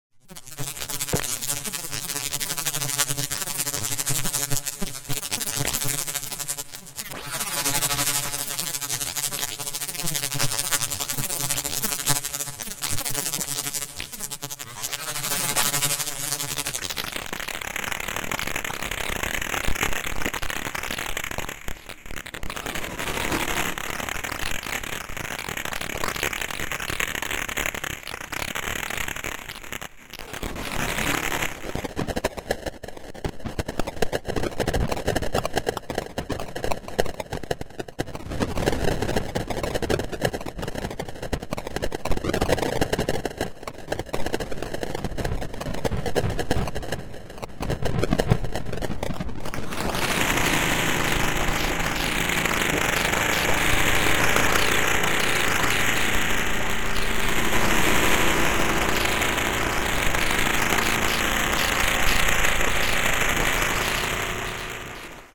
Spoke Spinna 02
Da spoke, da spins, da storted. Field recording of a bike tire spinning, ran through several different custom distortions.